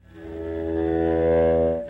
Recorded on a Peavy practice amp plugged into my PC. Used a violin bow across the strings on my Squire Strat. This is the lower (open) E note.
real, experimental, note, bowed, guitar, electric, string